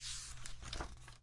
pass a page